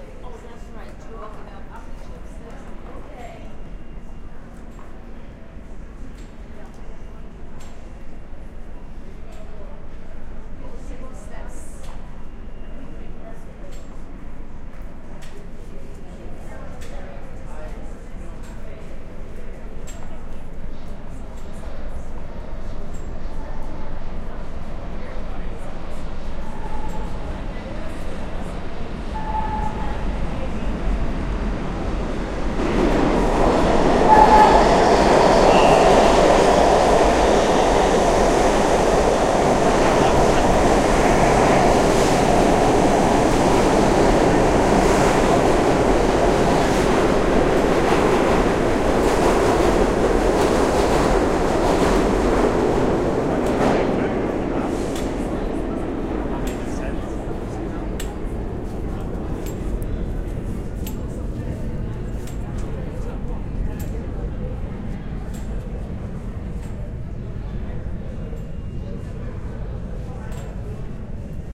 Subway Platform Noise with Passing Train
nyc, underground, train